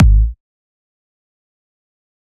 Free Kick drum made with drum synth